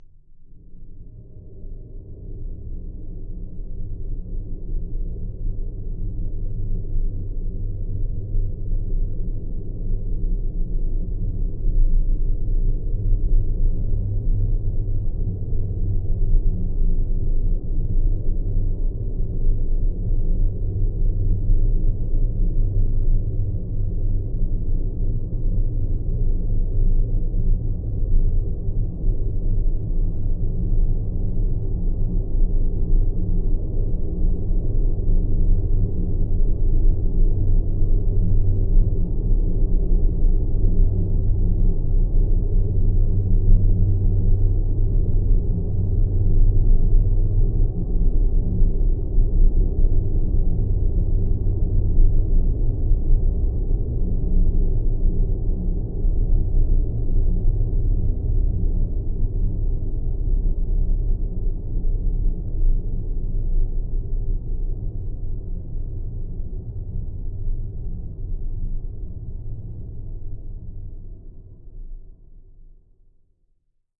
LAYERS 023 - Industrial drone-26
I took for this sound 4 different machine sounds: a wood milling machine, a heavy bulldozer sound, a drilling machine and some heavy beating sounds with a hammer. I convoluted the four sounds to create one single drone of over one minute long. I placed this sound within Kontakt 4 and used the time machine 2 mode to pitch the sound and there you have the Industrial drone layer sound. A mellow drone like soundscape... suitable as background noise. Created within Cubase 5.